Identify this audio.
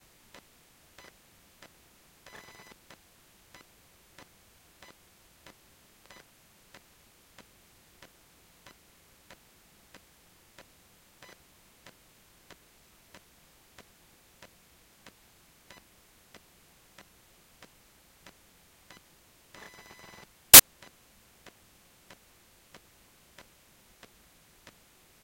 ems Smartphone
Electromagnetic waves of a smartphone recorded with a pickup.
smartphone, technology, electromagnetic-waves